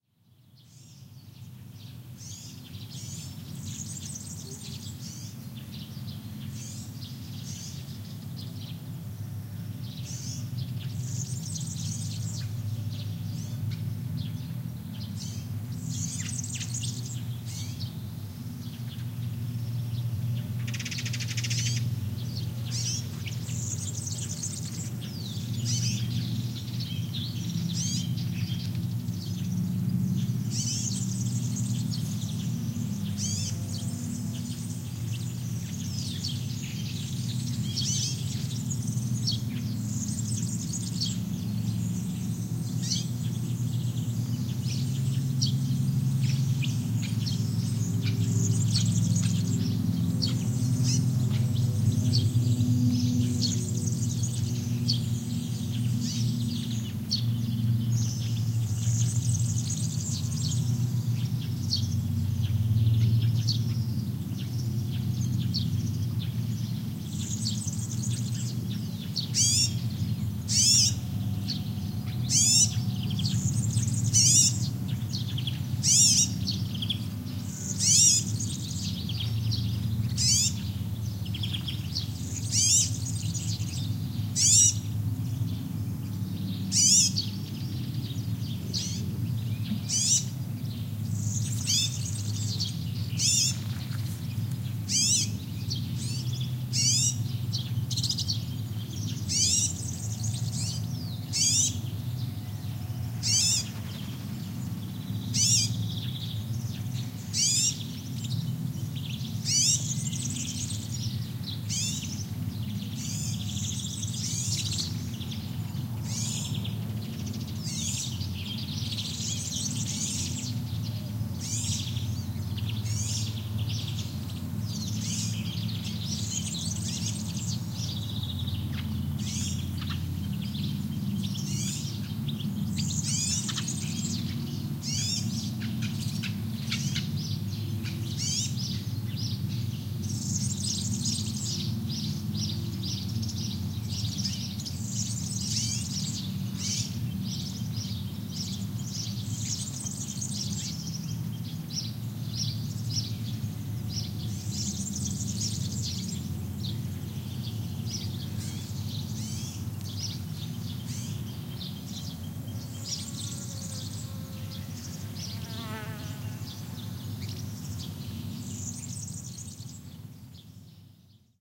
20160610 passing.plane.forest
Light aircraft passing high, forest ambiance in background. Sennheiser MKH 60 + MKH 30 into Shure FP24 preamp, Tascam DR-60D MkII recorder. Decoded to mid-side stereo with free Voxengo VST plugin